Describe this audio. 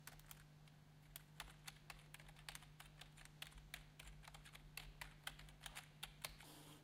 Keyboard clicks on regular keyboard
click, Keyboard, type